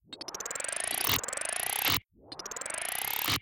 Created to match a video element of a growing dotted line, like one used to show a connection between two or more icons on screen.